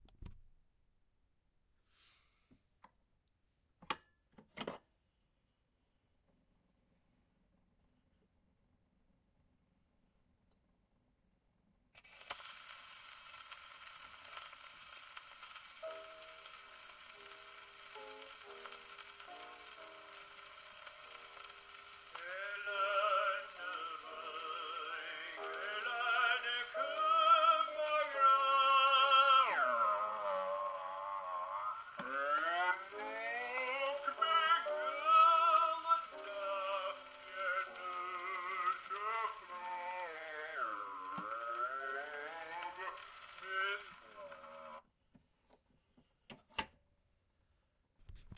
Gramophone speed mess-up
Gramophone playback rate fun.
antique, antique-audio, Gramophone, mechanical-instrument, Playback-rate